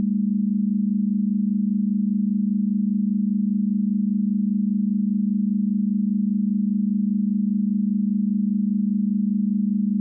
base+0o--3-chord--03--CDG--100-70-12
test signal chord pythagorean ratio
test, signal, pythagorean, ratio, chord